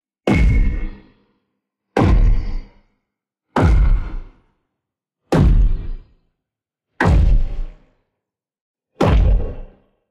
Magic Impact
impact, magi